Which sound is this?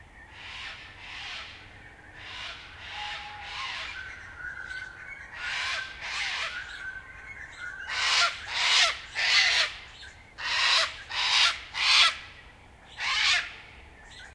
Cockatoos flying and squawking overhead.
Recorded with Zoom H1
Edited with Audacity